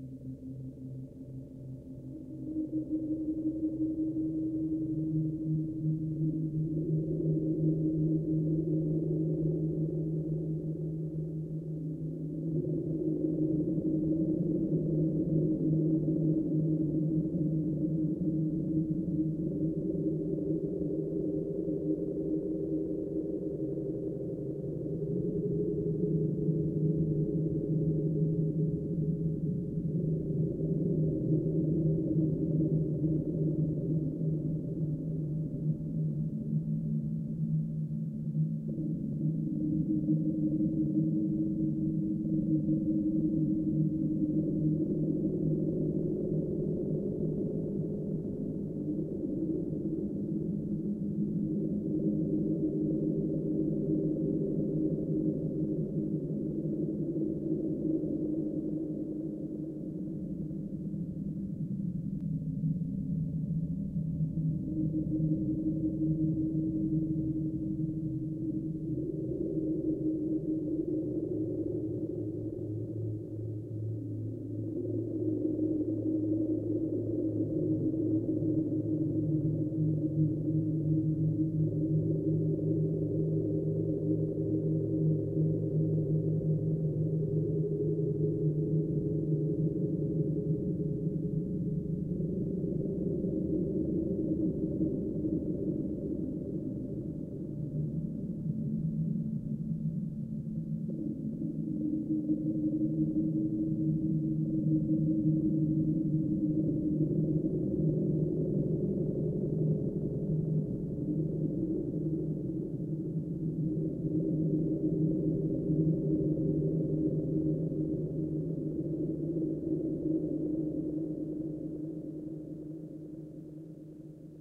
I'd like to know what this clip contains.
No Longer
Here is a creepy graveyard-like atmosphere sound for any kind of horror videogame. It goes well with crow sounds.
Amb, Ambiance, Ambience, Atmosphere, Creepy, Ghost, Horror, Scary